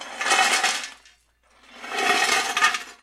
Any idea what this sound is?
Metallic Broken Glass
Sloshing around some broken pottery in a large aluminum pot.
broken, chains, industrial, metal, metallic, percussion, sloshing